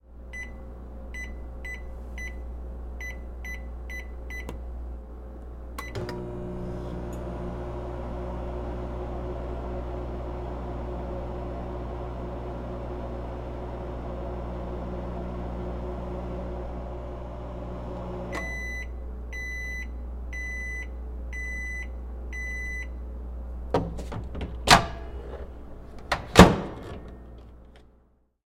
Yum! Time for a Hot Pocket!
beep, microwave, end